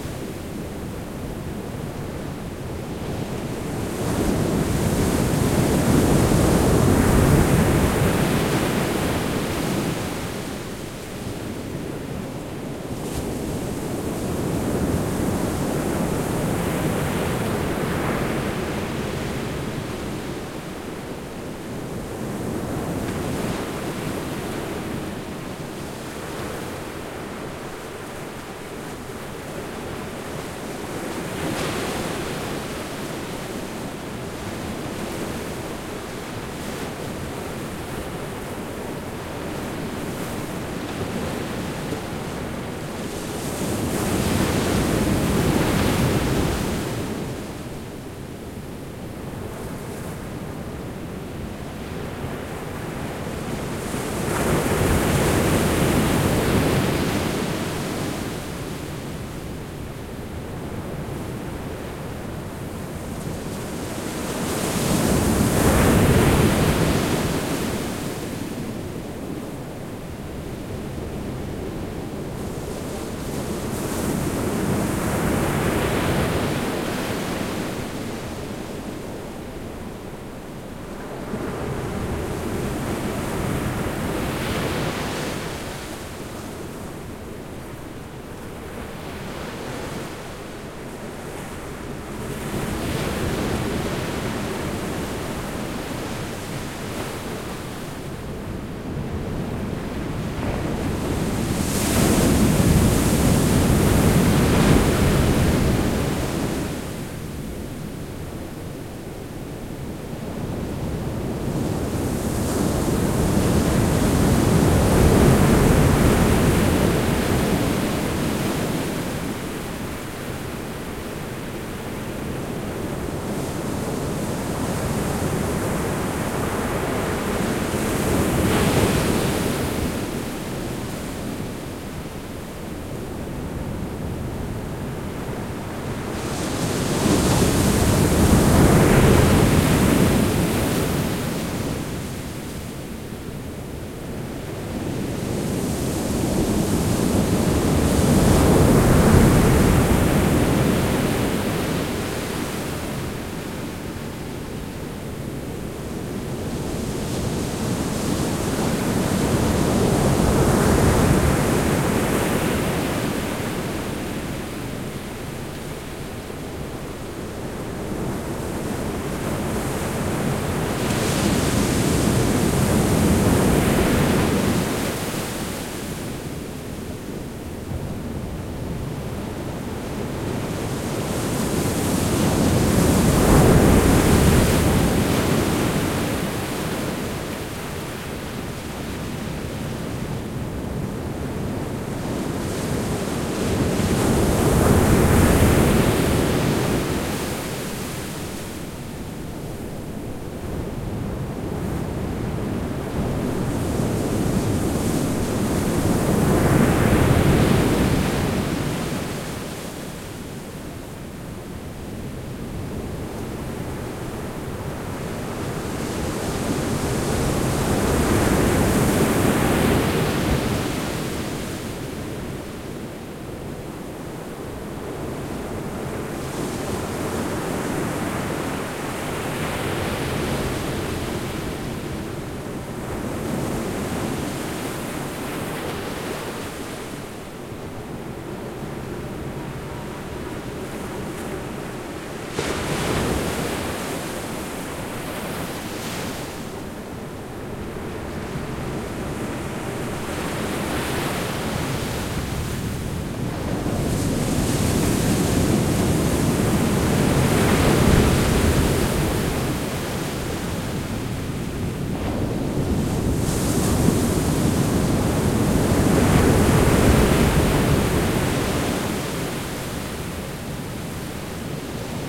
Galizano beach, mic in the beach, close to the waves.
waves, water, close, sea, beach